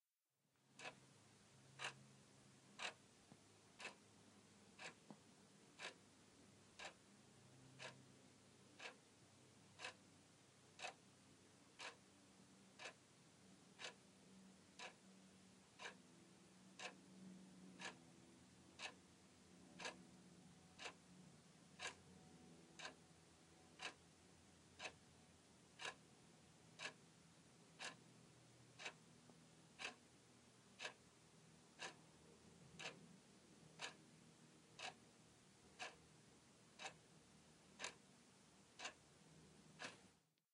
clock tick2
An electric clock on the wall ticking. Some ambient hiss.
clock, clock-tick, clock-ticking, tick, ticking, tick-tock